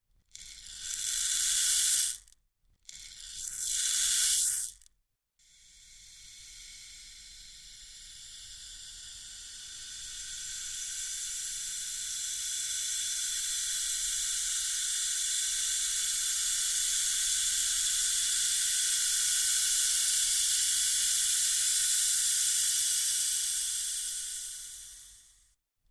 A wooden shaker recorded in a sound proof booth. Edited in Audacity. The first sound is only minimally edited to remove some noise with a bit of fade in and out. The second has a small amount of phaser added and the third has paul stretch from audacity's effects list added to it. Could be used for the sound of a gas leak.
air, gas, phaser, compressed-air, rattler, shake, hissing, rain, rattle, gas-leak, shaker, hiss, rustle, woosh